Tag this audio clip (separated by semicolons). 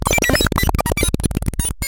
artificial; beep; beeping; computer; digital; electronic; glitch; harsh; lo-fi; noise; NoizDumpster; TheLowerRhythm; TLR; VST